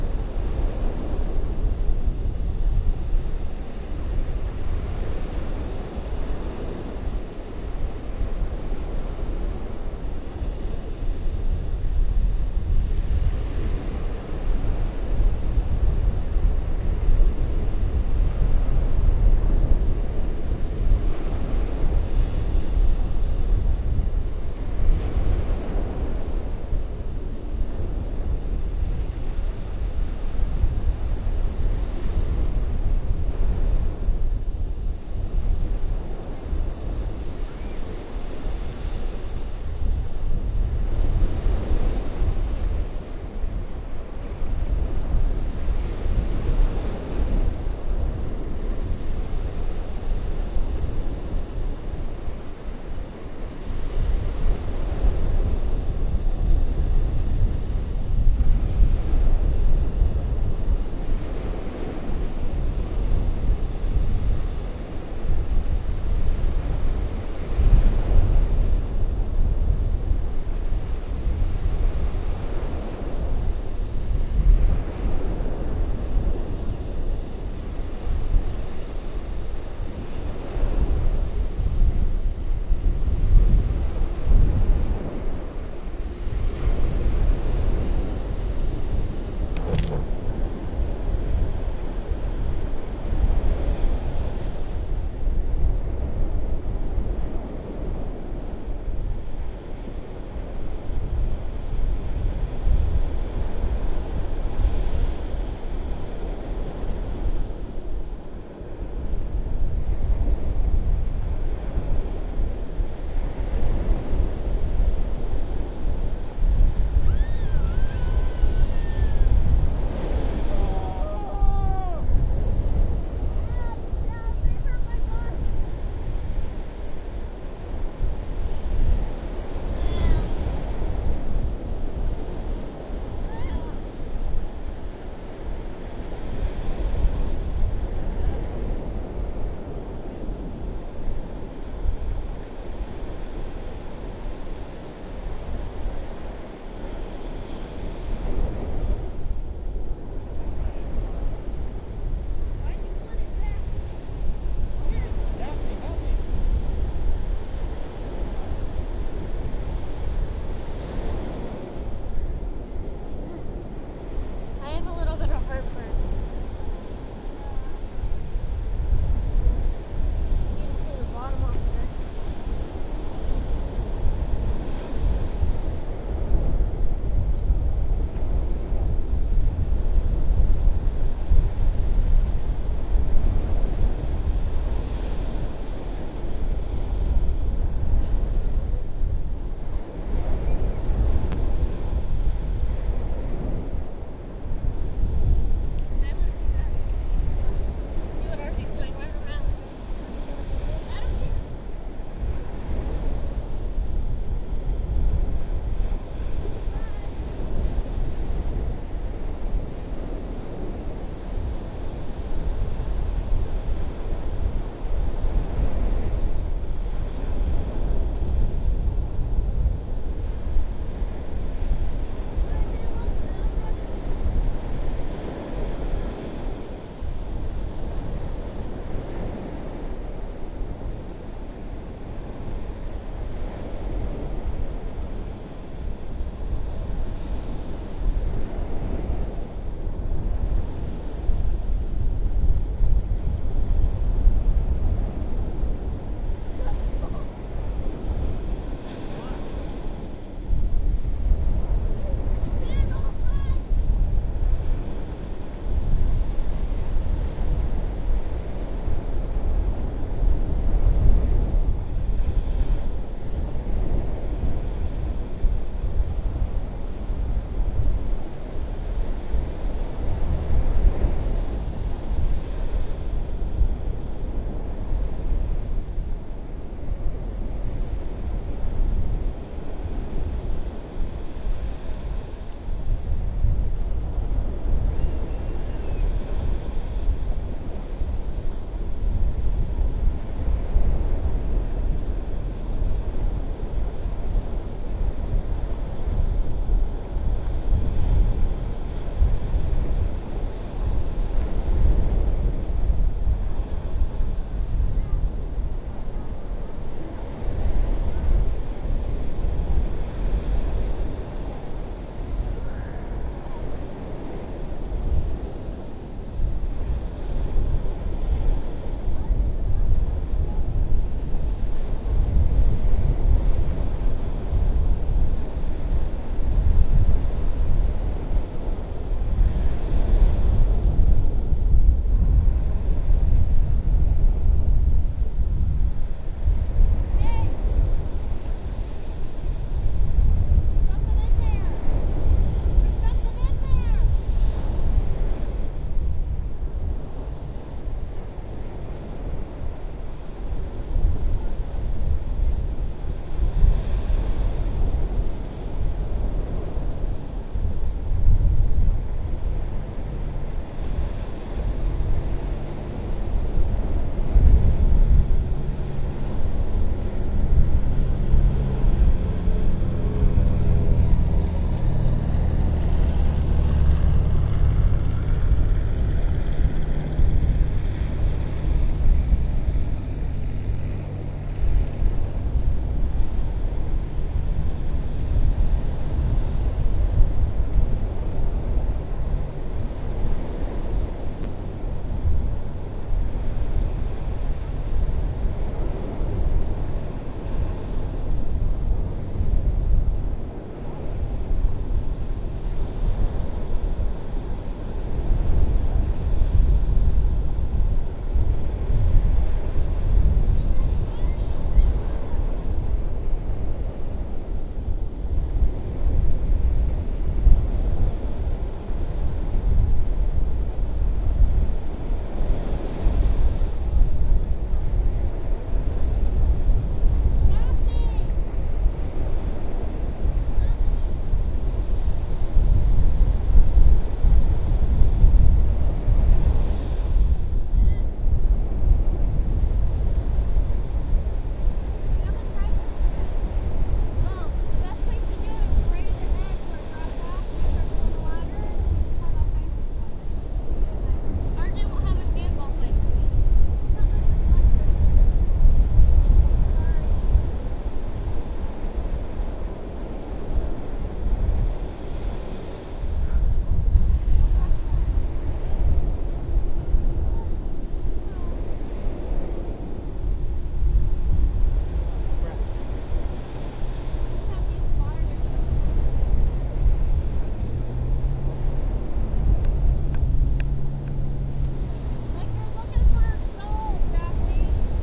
Farther back on the beach facing south recorded with laptop and USB microphone.